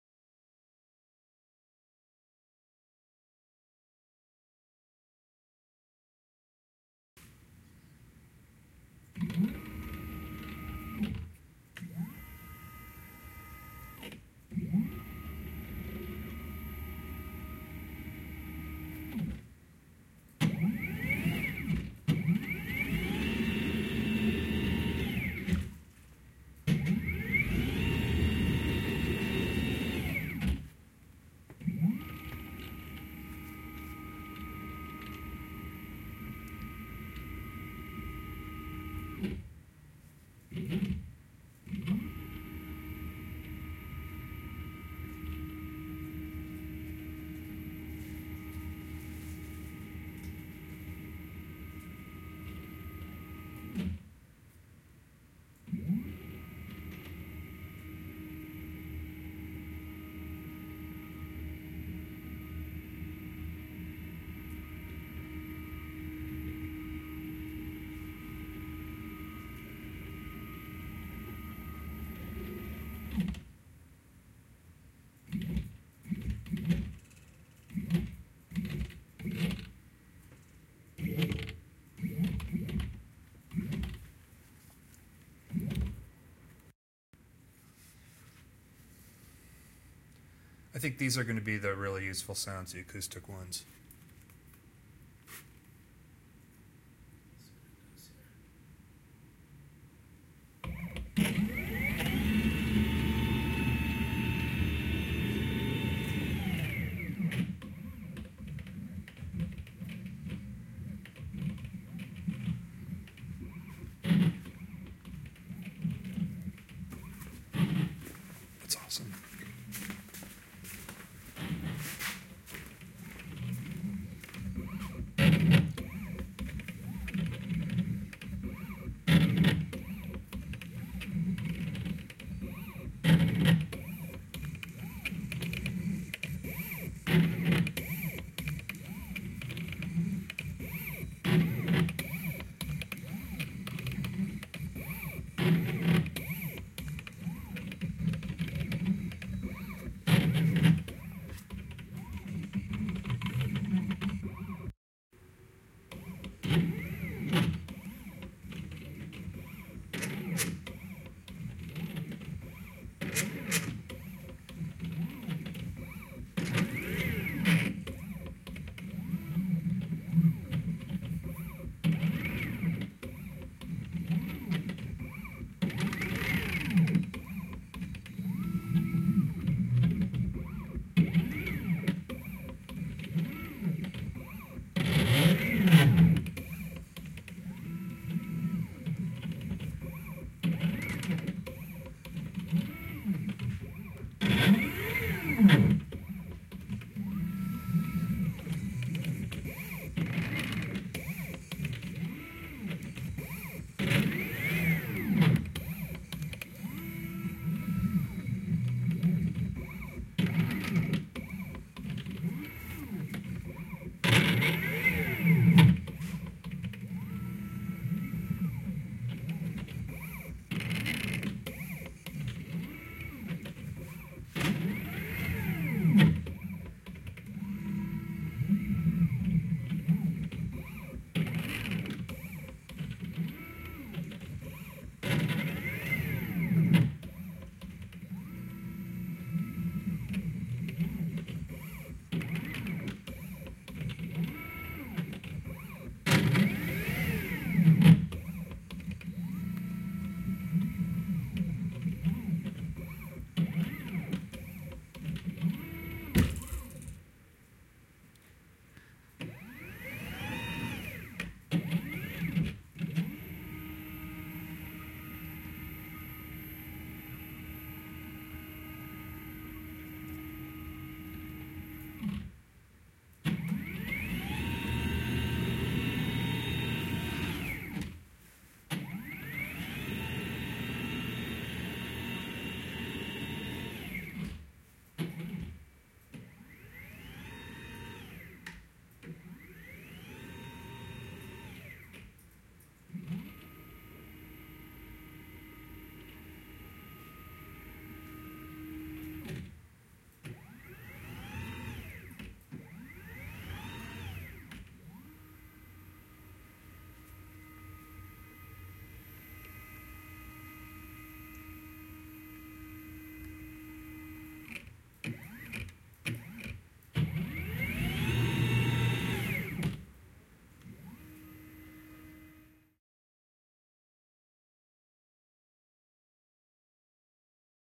industrial 3d cutter acoustic
motor servo 3d printer 3d cutter industrial robot machine mechanical robotic factory acoustic
Recording of various movements with of 3d cutter with hand held recorder.
factory, industrial, printer, mechanical, motor, robot, cutter, machine